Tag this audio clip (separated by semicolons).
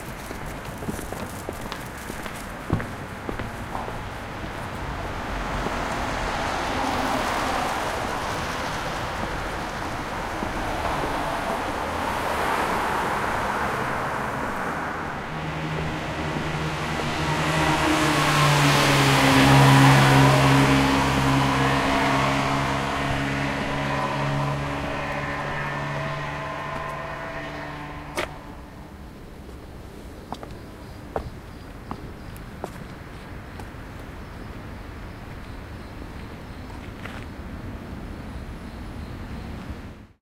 night; city; atmosphere; ambient; urban; Japan; japanese; walking; evening; street; cars; field-recording; ambiance; exploring; tourism; ambience; city-noise; Tokyo; traffic